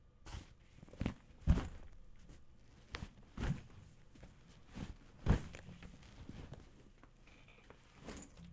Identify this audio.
bag being placed
a small book bag being picked up and placed
bag, placed